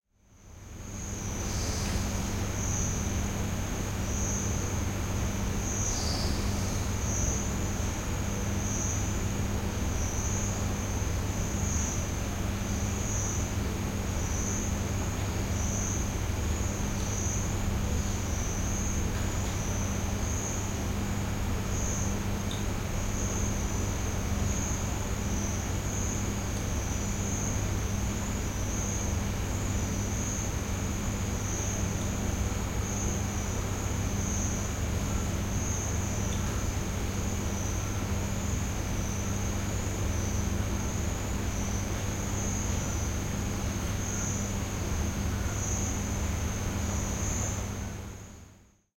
Early morning Interior room tone + exterior natural ambience
This recording is done inside a closed room very early in the morning. You can hear the exterior ambience from inside of crickets and frogs. Useful if you are looking for an ambient sound that will give you the feel of a room and a post-rain environment outside. Recorded on my iPhone 12 Pro using Dolby On app.